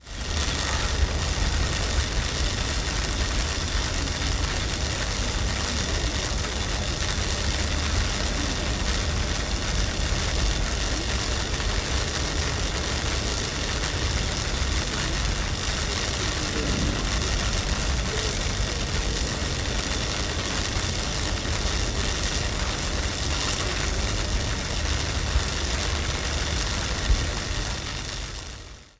Electric Pylon Power Line-Buzz 01
I was trying to record in a nature reserve and thought my gear had packed in until I found the source of the problem...
buzz, buzzing, circuit, electric, electricity, hum, power, power-line, pylon